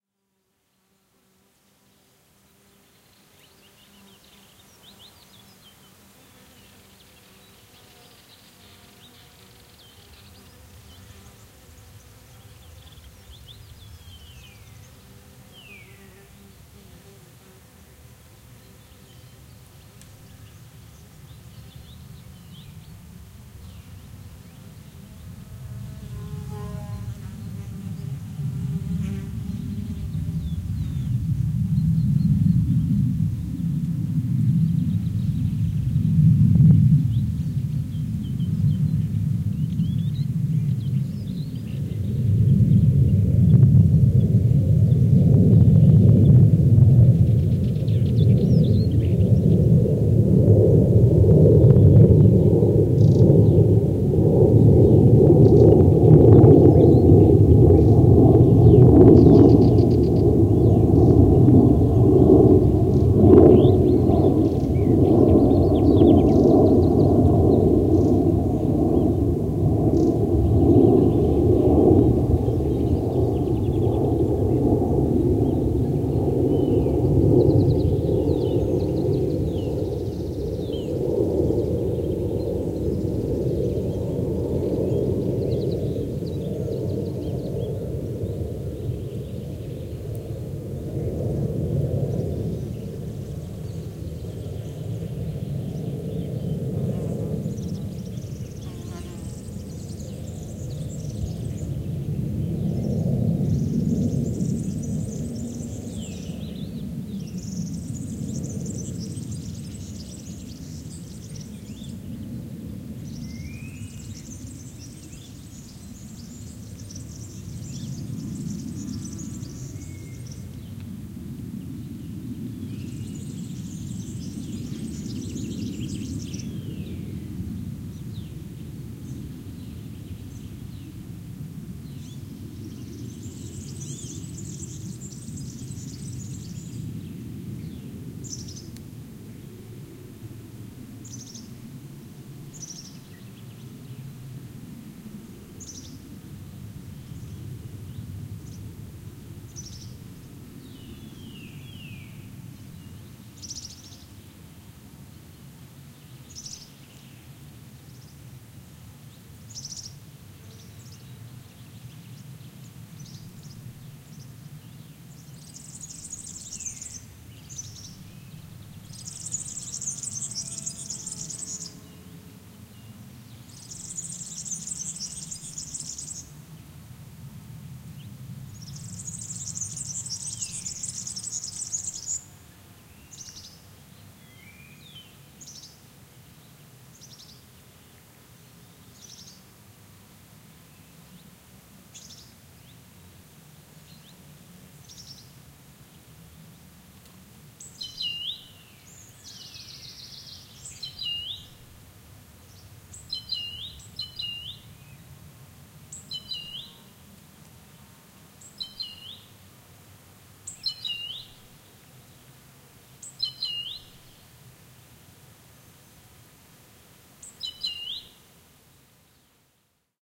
20170226 plane.overheading.scrub
An airplane overheads the scrub, with insects buzzing and birds singing in background. Recorded near Arroyo de Rivetehilos (Donana National Park, S Spain) using Audiotechnica BP4025 > Shure FP24 preamp > Tascam DR-60D MkII recorder